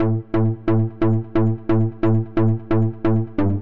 cool synth tone
dubstep, loop